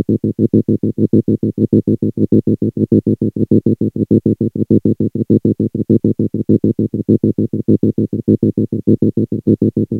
Kind of an alien like alarm.
It was created with my Pulsar Synthesis Synthesizer i build in Pure Data.
Does have a much better effect with some 0.1 s Echo.